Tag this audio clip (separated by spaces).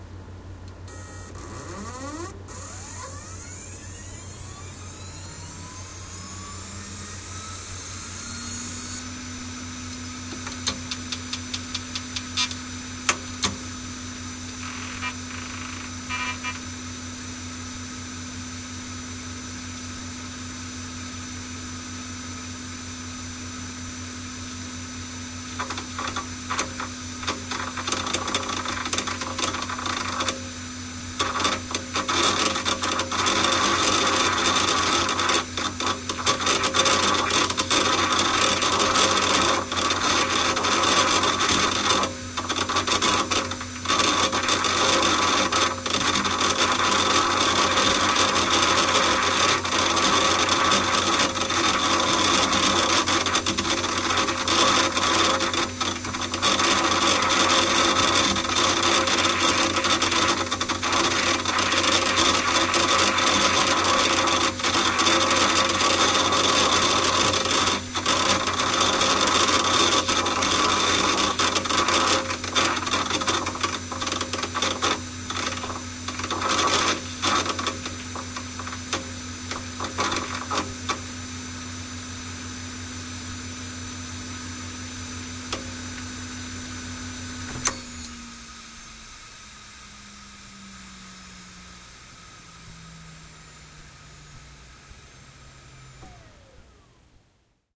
drive disk rattle hdd machine hard motor